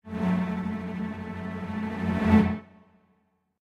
Tremolo Strings 5
A tremolo crescendo made in Logic Pro X.
I'd love to see it!
cinematic, creepy, crescendo, dark, haunted, horror, melodic, moment, music, musical, spooky, stab, sting, strings, suspense, transition, tremolo